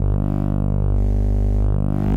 sherman cable83
I did some jamming with my Sherman Filterbank 2 an a loose cable, witch i touched. It gave a very special bass sound, sometimes sweeps, percussive and very strange plops an plucks...
phat, dc, noise, sherman, touch, electro, analog, current, fat, analouge, filterbank, ac, cable, filter